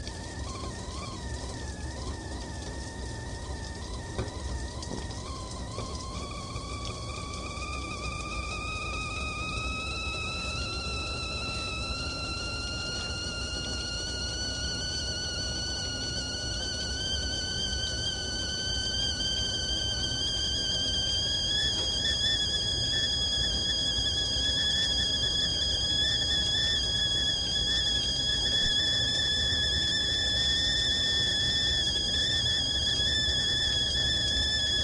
It`s an old whistling teapot.
teapot, whistle, kitchen, noise, home